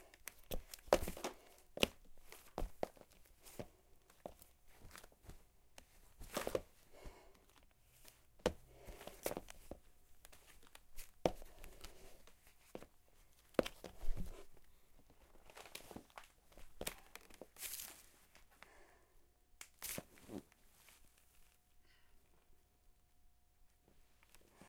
Person staggering and tripping.